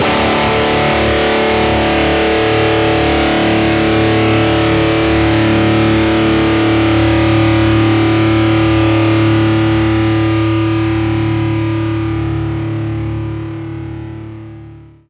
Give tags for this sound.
Melodic
Distortion

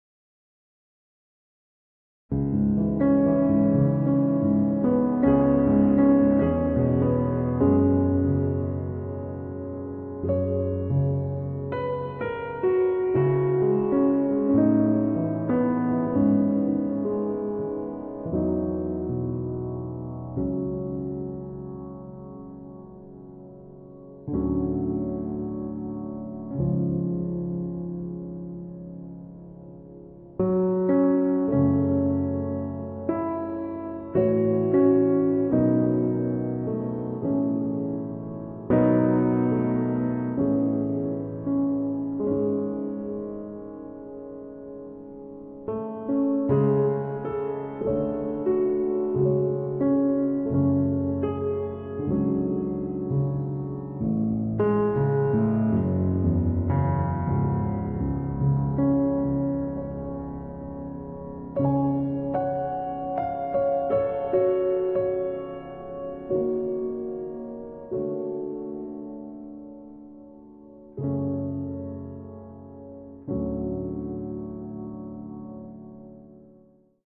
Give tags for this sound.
chords
classical
improvisation
piano
playing
recording

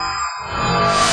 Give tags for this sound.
processed
beat

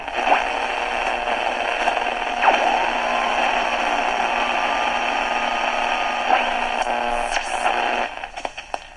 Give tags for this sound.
radio
Japan
Japanese

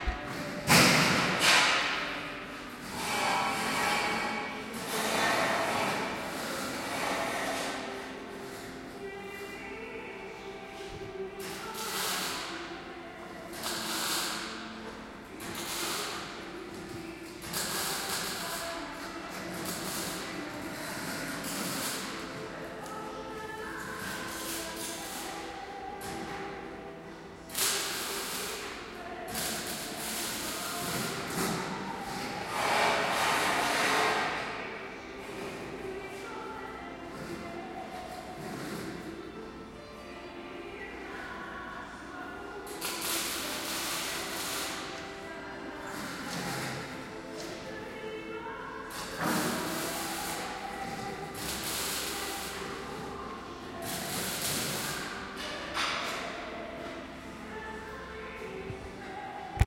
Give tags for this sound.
Hitting
Industrial
Machinery
Meta
Metal
Steampunk
Welding
Workshop
grinding

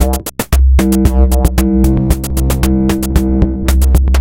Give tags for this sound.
114-bpm
bass
drumloop
electro

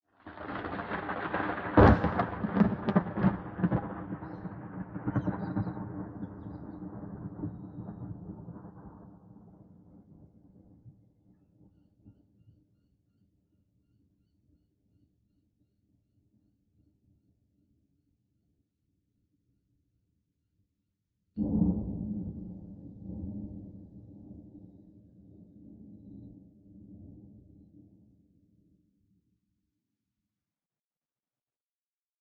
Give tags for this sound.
ambiance
ambience
atmosphere
cloud
field-recording
lightning
outdoor
rain
storm
thunder
thunderstorm
weather

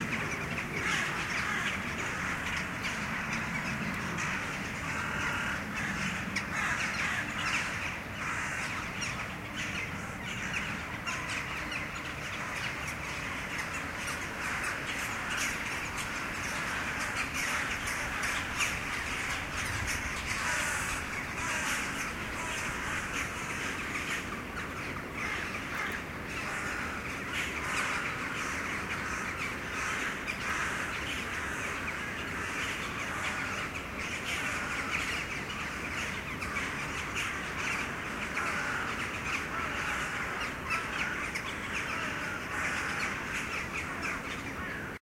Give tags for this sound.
early-morning
field-recording
wildlife
crows
birds
urban
fieldrecording
ambience
jackdaws
city

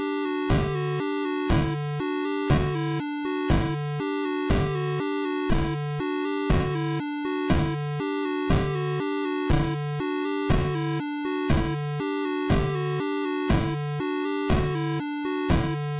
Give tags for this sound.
chiptune
tune

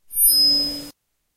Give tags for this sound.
feedback
mic
microphone
mike
noise
whistling

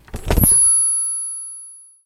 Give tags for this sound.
feedback
thud

